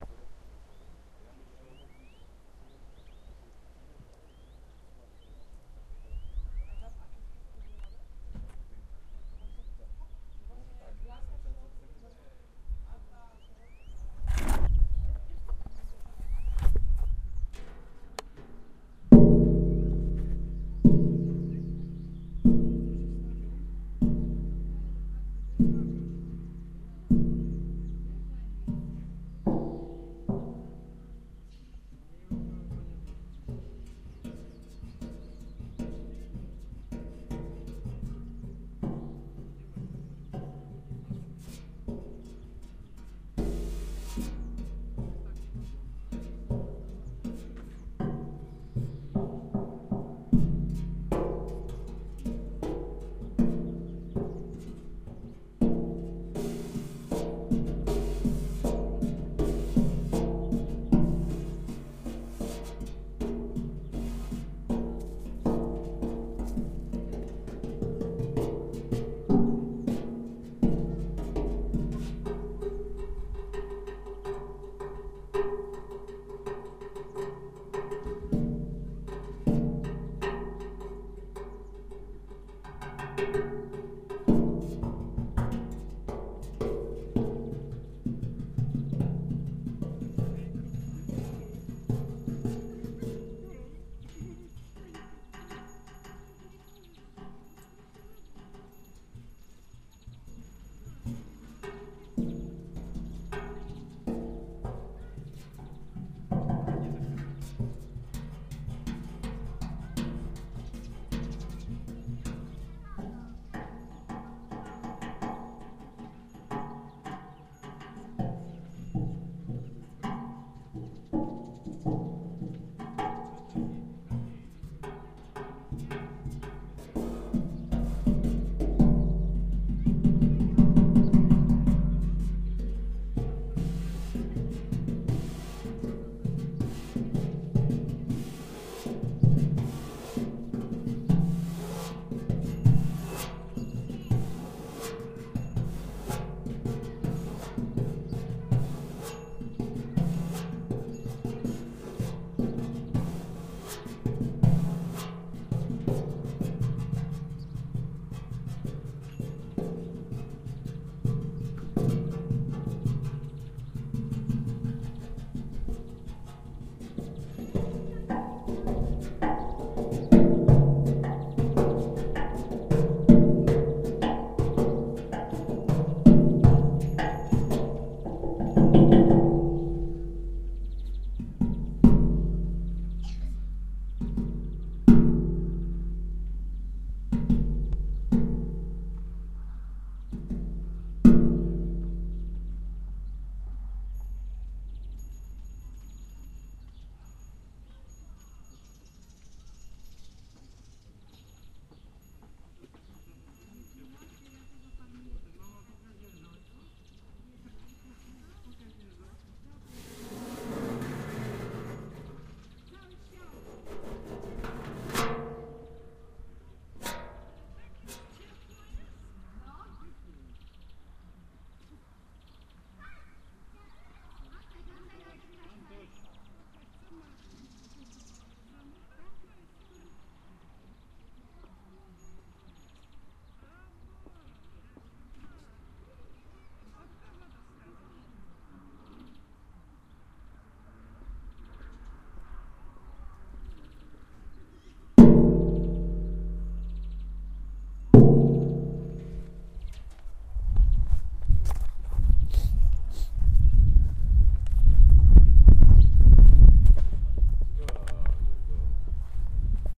metal arythmic hits
hitting in metal can, good stuff to slice, H4 stereo rec
metal, hits, ambient